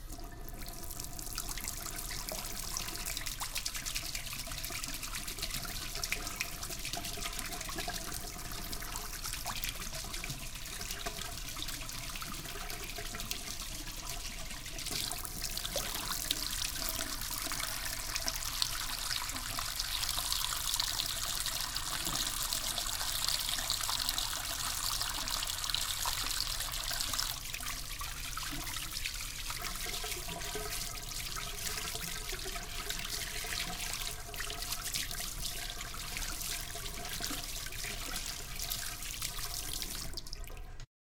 wash hands razor
hands, razor, wash